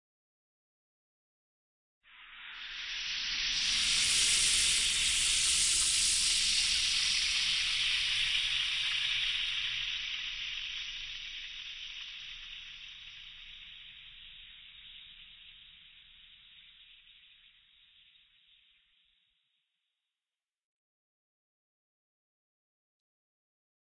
SHORT-SIZZLE-FRY-PAN
08.02.16: A waveform made of three sizzling pans.
bacon, cook, eggs, frying, heat, meat, pan-fried, sizzle, sizzling